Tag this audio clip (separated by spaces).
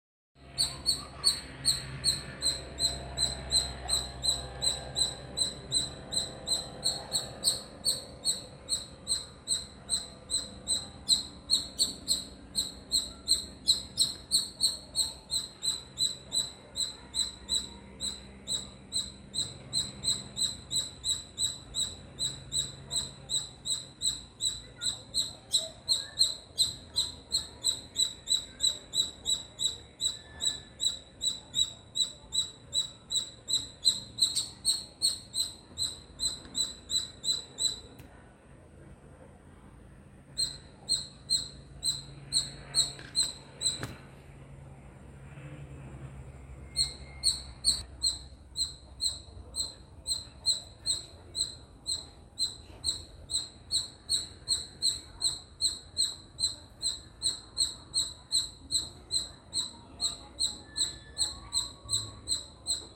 Bengaluru chirping squirrel